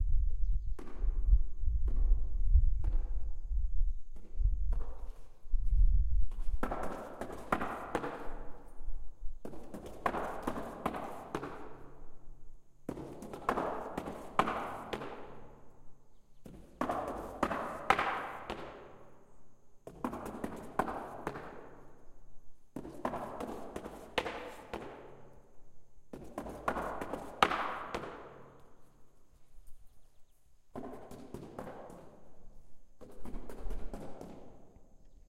dragging a piece of wood down a set of stairs inside of a silo. nice natural reverb.
echo, reverb